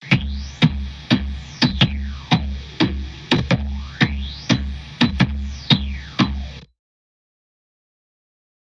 Percussion Loop
A repeatable drum loop created using a Pure Leaf tea bottle, and a Samson USB studio microphone. Recorded on 8/22/15. Altered using Mixcraft 5.
altered, bottle, cleaner, container, drum-loop, drums, improvised, percussion-loop, percussive, recording